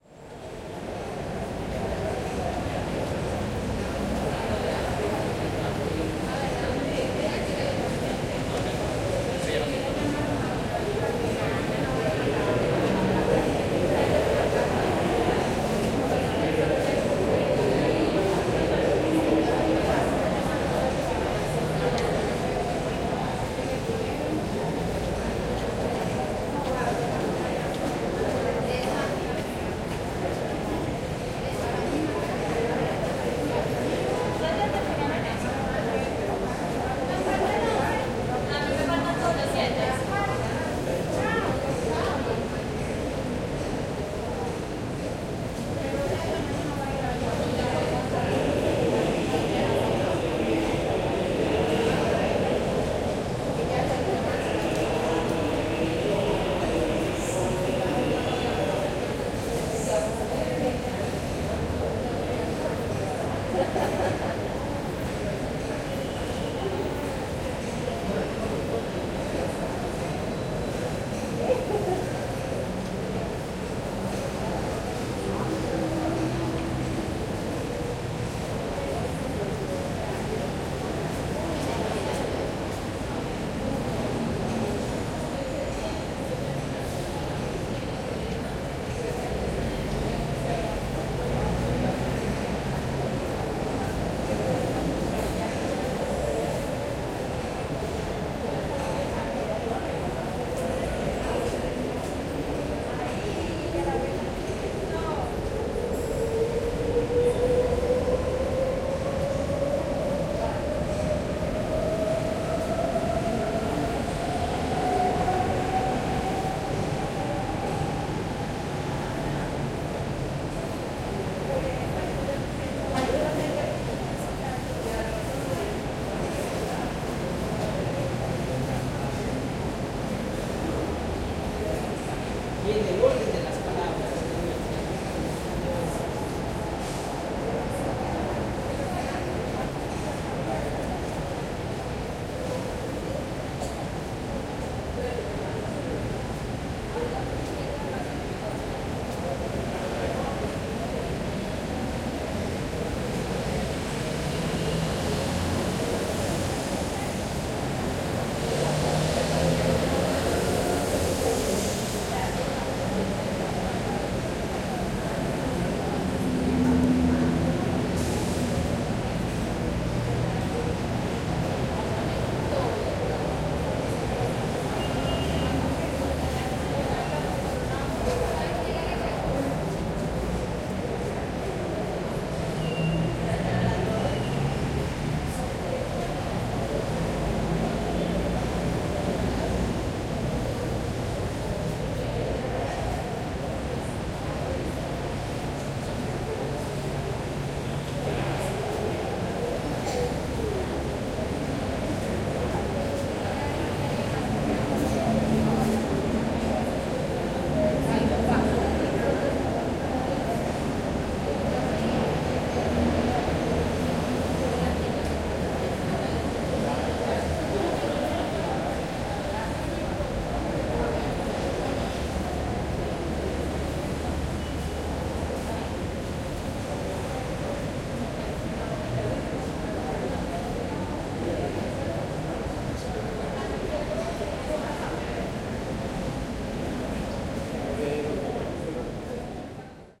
Walla from outside of a Medellin's metro station Stereo. Recorded with Zoom H3-VR.